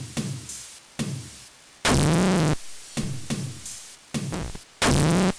I BREAK IT YOU BUY IT !!! It's a new motto.....
Hehehehe This is a Bent DR 550 MK II YEp it is....
glitch, circuit